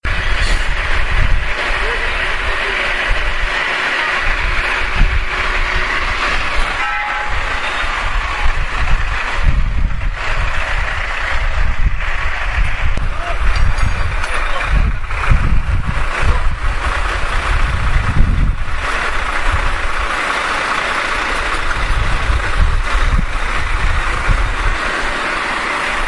Avaria d'un Cami—
This sound was recorded with an Olmpus WS550-M in the city of Figueres and it's the sound of a broken truck and it's replacement in the middle of a roundabout
traffic, engine, truck